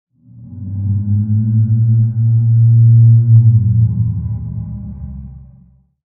Organic moan sound